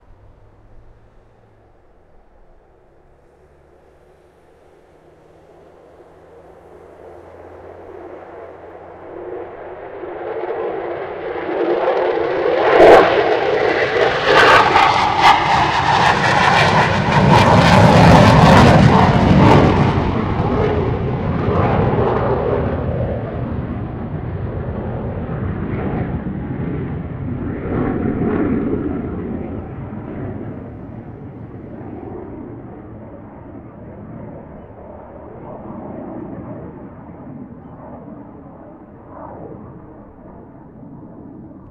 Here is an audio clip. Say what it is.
Field recording of F16 fighter jet take-off and fly over.
Recorded with Zoom H1 at Leeuwarden airport (Netherlands)